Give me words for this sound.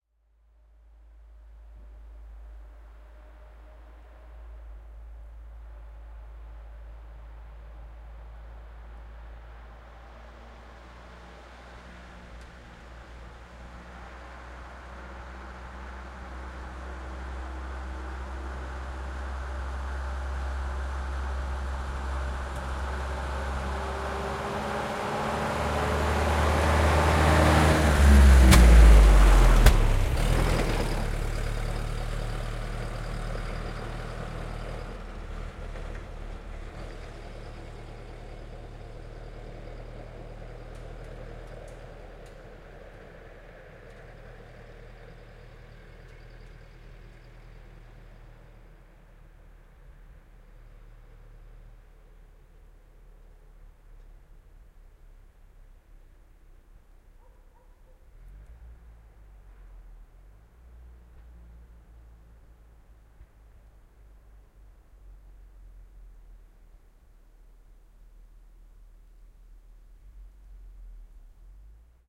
International, vm 1930. Ohiajo asfaltilla hitaasti läheltä vaihtaen kohdalla, moottorin köhähdys.
Paikka/Place: Suomi / Finland / Hattula, Metsäkylä
Aika/Date: 07.08.1991